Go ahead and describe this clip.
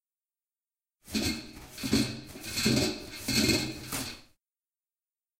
WC paper
This sound shows the noise that a roll of paper situated inside a metal case does when someone is taking and cutting it.
It was recorded in the toilets of Tallers building in Campus Poblenou, UPF.
campus-upf, Paper, WC, UPF-CS14, Toilet, Tallers